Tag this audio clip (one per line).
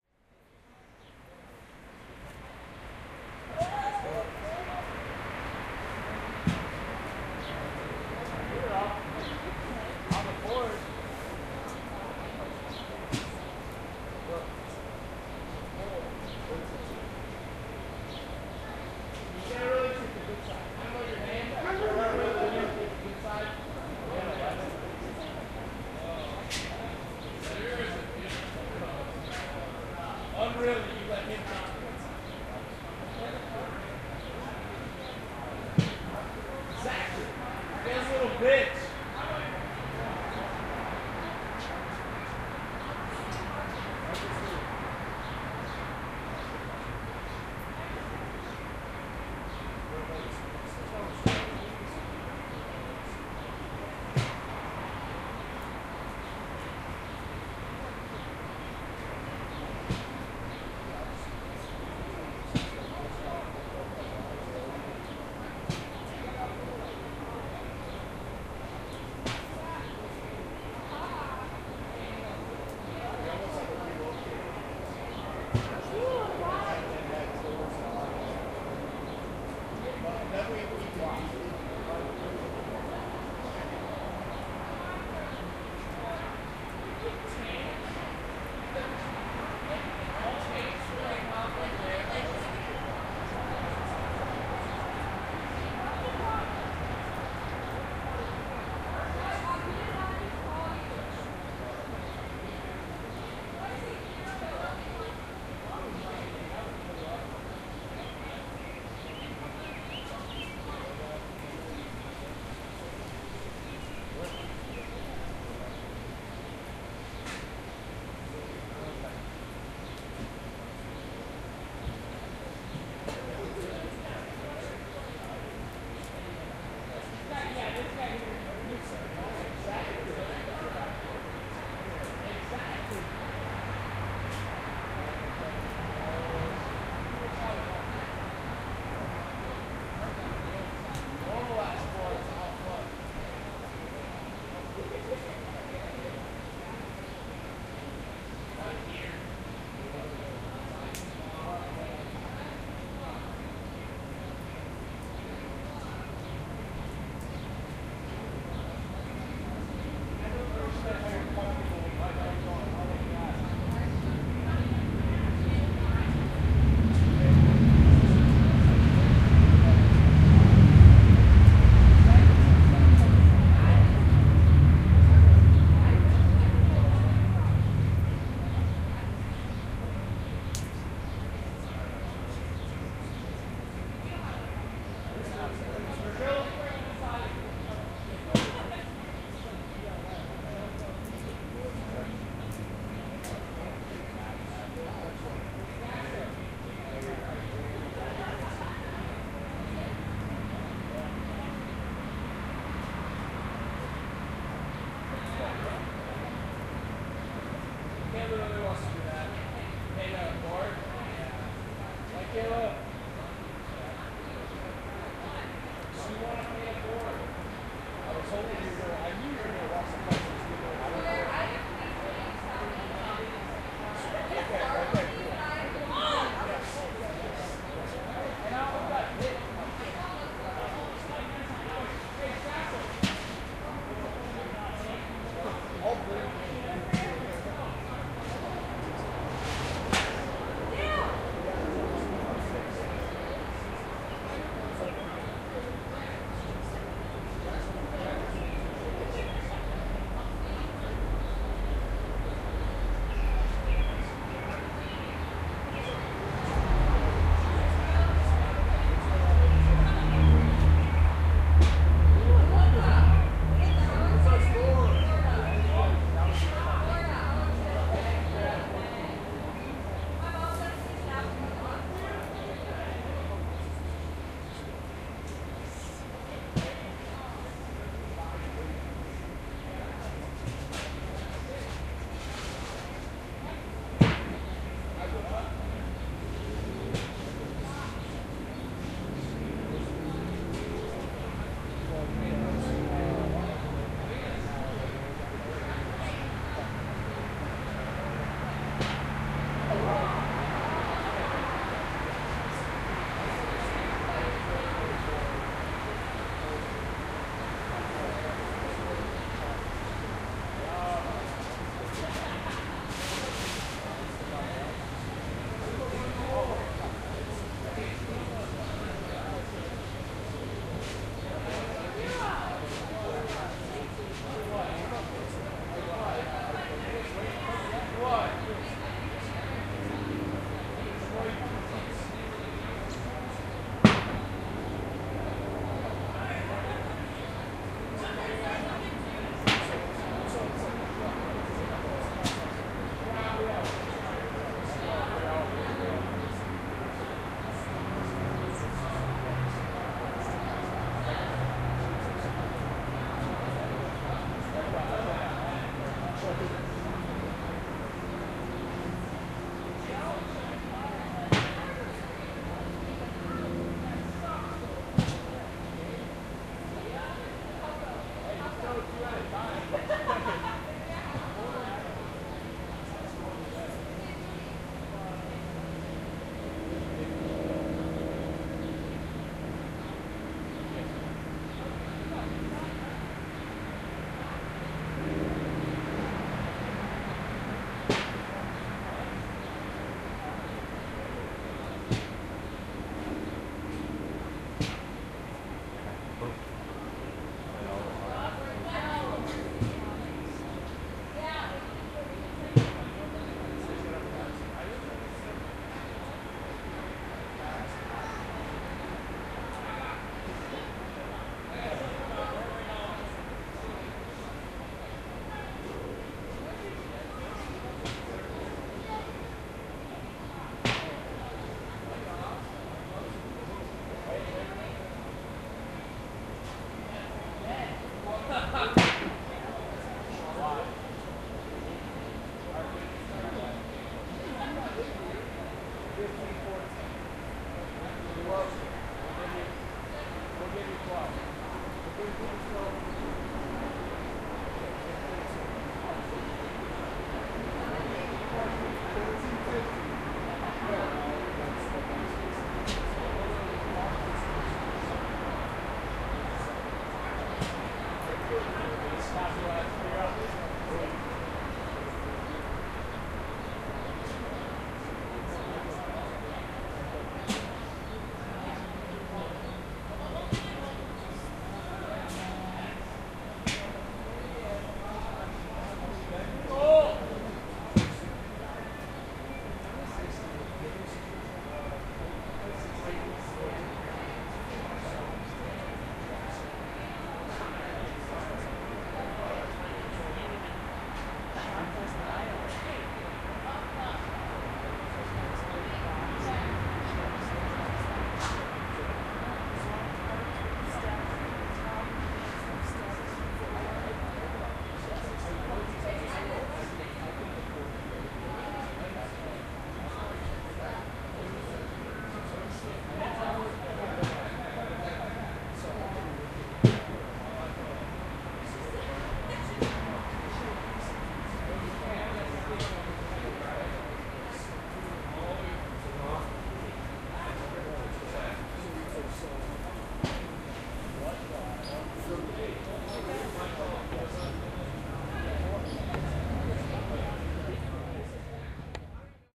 backyard-party
bean-bags
field-recording
barbecue
college